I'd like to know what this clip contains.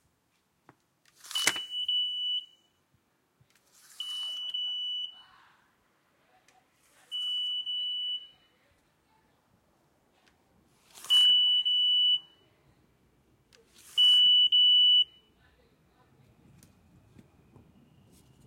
Subway, card swipe, insufficient fare
Swiping a Metrocard with insufficient fare in the NYC subway produces a triple-beep
fare, insufficient, metro, metro-card, Metrocard, MTA, new, new-york-city, NYC, subway, swipe, underground, york